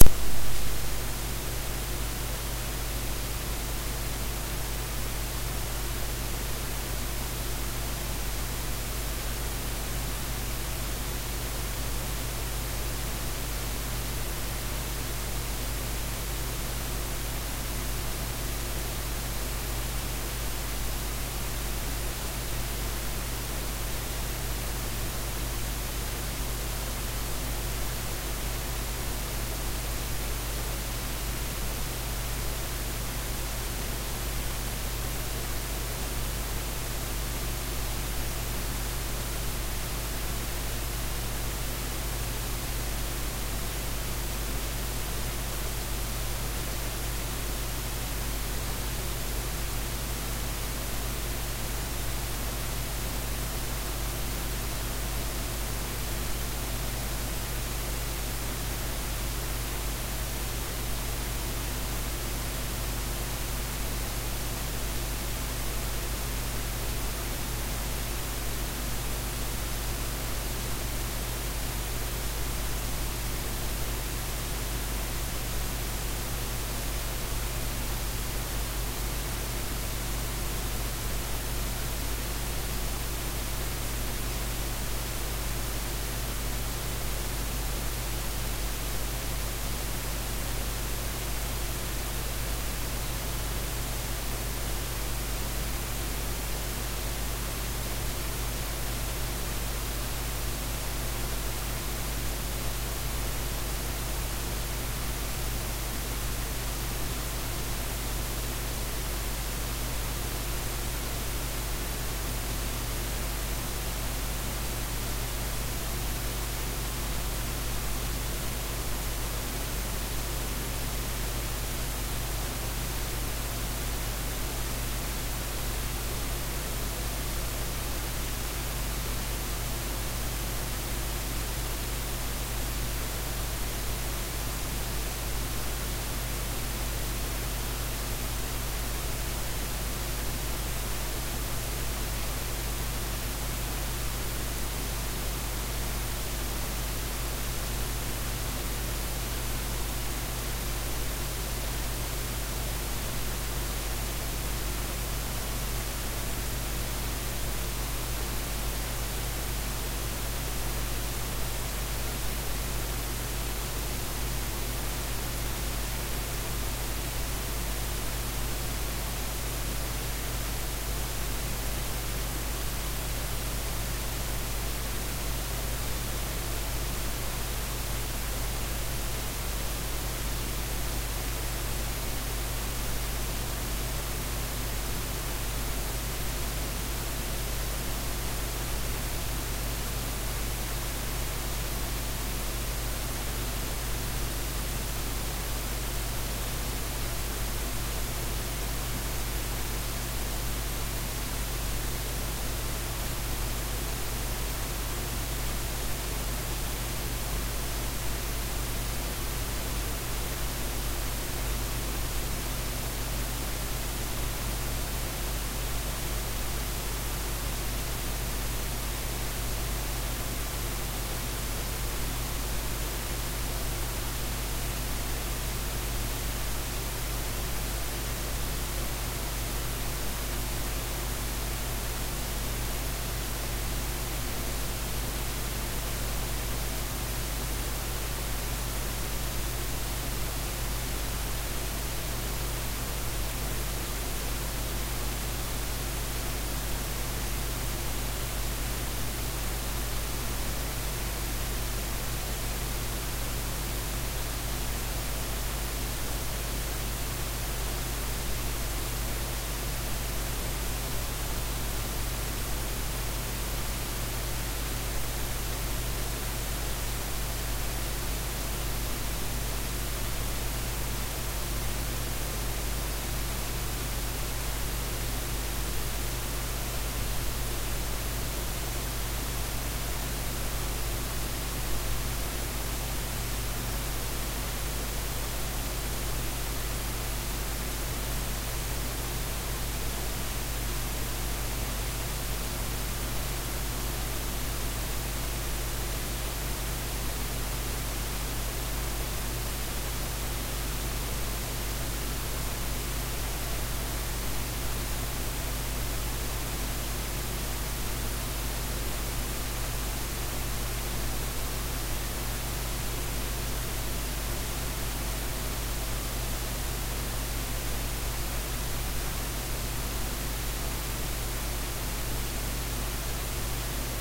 ECU-(A-XX)17+
Engine Starter Iso Synchrone
Engine, Iso, Starter, Synchrone